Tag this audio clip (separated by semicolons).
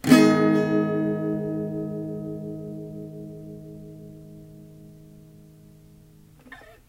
acoustic; chord; guitar; scale; small; strummed